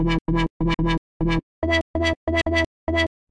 Live Wonk Synth 03
8bit, arcade, synth, session, wobbler, live